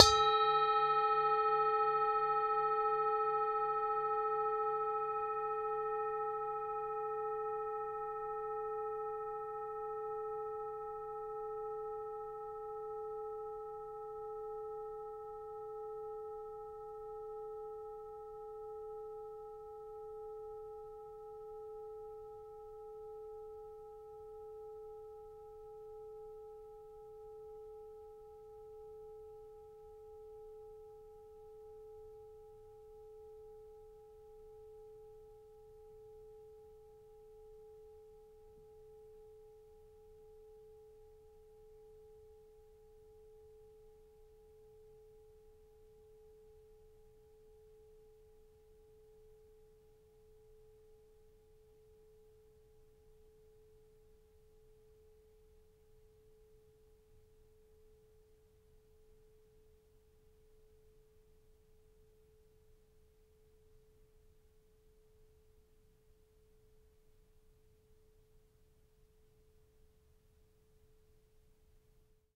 A singing bowl, struck once on edge, ringing out
Recorded on 15 September 2011 with an AKG 414